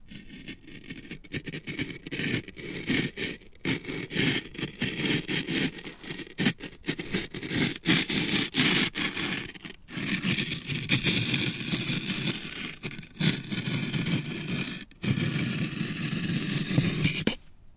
Stone scratching over rock (close up), continuously, H6
close-up, rock, Sandstone, scraping, scratching, Stone